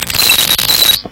Mute Synth Clicking 014
Clicking and gurgling noisy little sound.
blip, click, clicking, crackling, electronic, gurgling, Mute-Synth, noise, noisy